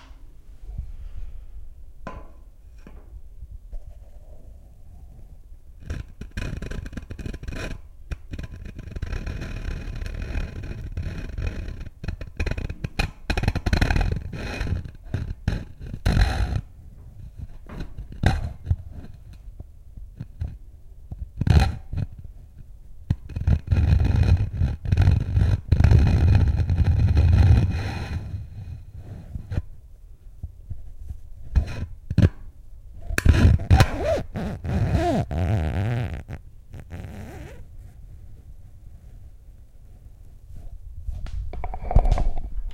Scraping a mirror. Also sounds kind of like a balloon being rubbed.